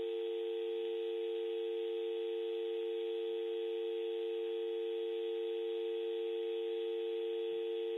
British dial tone picked up on phone line from a Panasonic cordless landline into a 5th-gen iPod touch.